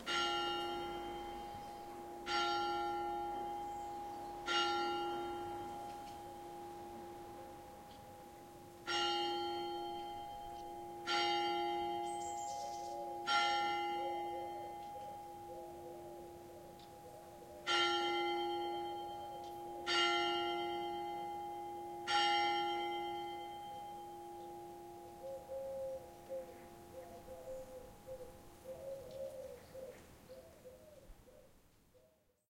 morning bell2
A morning in a small village : the church bell, birds, dove.
Recorded with a Marantz PMD661, and a pair of Senheiser K6.
ambiance, bell, birds, countryside, field-recording, morning